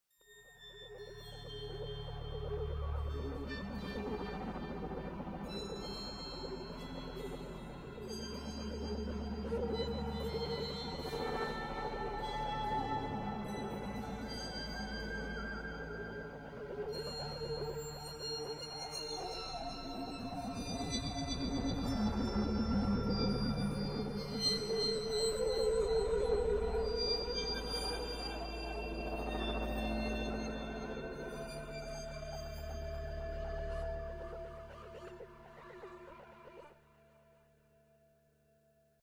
This is an atmospheric background suited to science fiction/horror/suspense environments. It was recorded using Reaper, with sounds coming from Independence, Loom, AAS String Studio and AAS Player VST instruments.
Ambient, Atmosphere, Dark, Horror, Scary, Scifi, Suspense